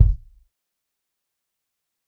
Dirty Tony's Kick Drum Mx 027
This is the Dirty Tony's Kick Drum. He recorded it at Johnny's studio, the only studio with a hole in the wall!
It has been recorded with four mics, and this is the mix of all!
pack, dirty, drum, tonys, raw, punk, tony, kit, realistic, kick